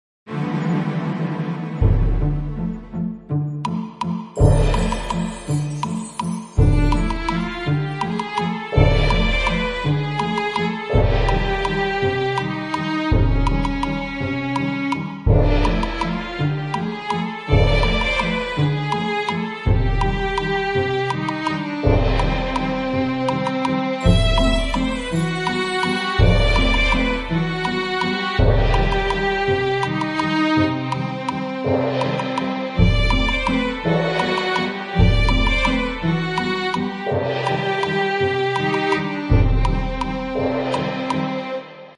Waltz of the doomed
A gloomy, orchestral intro.
sad, waltz, fantasy, march, cinematic, orchestral, dance, strange, dramatic, instrumental, movie, film, soundtrack, epic, strings, gloomy, spooky, weird, game